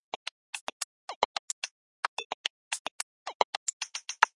glitchy beat 2 mix
Light electronic percussion loop.
110, beat, bmp, electronica, glitch, light, loop, loopable